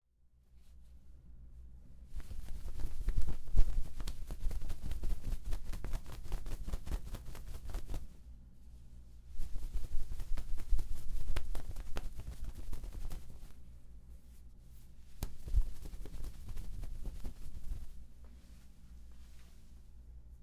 Volar, trasladarse, flotar
flotar, trasladarse, Volar